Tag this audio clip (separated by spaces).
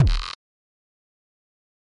sound effects kick free